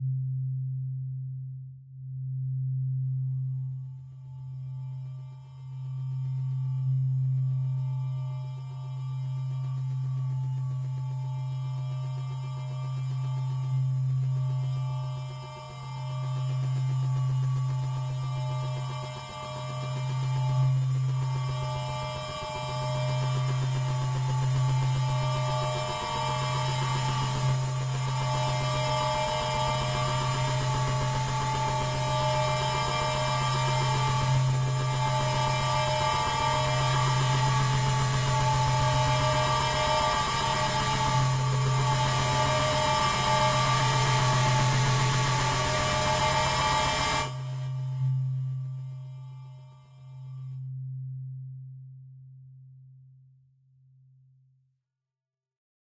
Horror sound rise
monster, sfx, video, games, sci-fi, evil, rise, fx, move, horror, effect, sound